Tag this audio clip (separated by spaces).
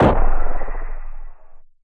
distance loop effect medium gun firing